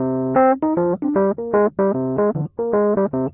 rhodes meth jam
Bouncy little tune played on a 1977 Rhodes MK1 recorded direct into Focusrite interface. Loopable at ~74BPM
odd, vintage, off-kilter, rhodes, chord, electric-piano, electroacoustic, keyboard